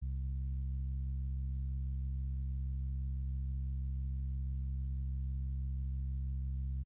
A simple Triangle Waveform by Doepfer A-100
A-100, Doepfer, Synthesizer, Triangle, Waveform